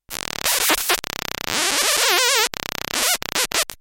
A czech guy named "staney the robot man" who lives in Prague build this little synth. It's completely handmade and consists of a bunch of analog circuitry that when powered creates strange oscillations in current. It's also built into a Seseame Street toy saxophone. This set sounds like a robot talking. If chopped up and sequenced you could do a lot with it.
synth, android, speach, homemade, analog, robo, prague, squawk, squeek, machine, beep, robot, circuit-bent, czech